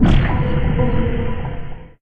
Explosion whine
Perfect for bringing the ultimate immersion into glorious space adventures!
A collection of space weapon sounds initially created for a game which was never completed. Maybe someone here can get more use out of them.
tachyon, torpedo, phaser, laser, quark, space, particle, neutron, explosion, missile, weapon, pulse, gun